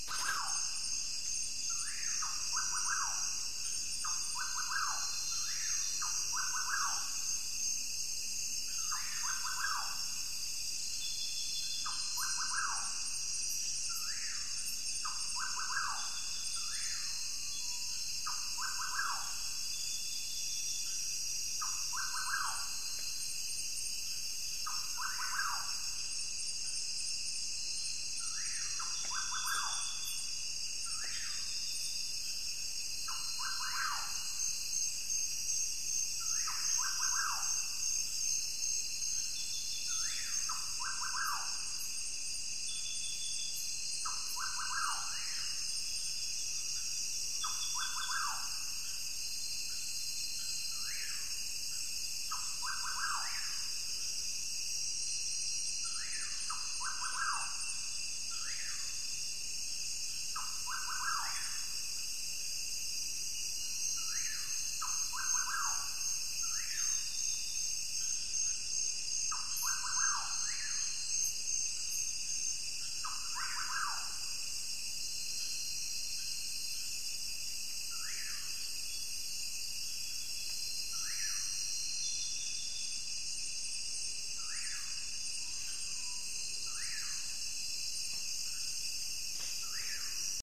nightbird amazonas close
Calls of a brazilian nighthawk, Common Pauraque (port. Bacurau) , Nyctidromus albicollis. This is a recording from august 2002 during a 5 days stay in the brazilian rainforest near Manaus. I used a Sony DAT-recorder TCD D8 with a Sony stereo microphone ( normally used for interviews).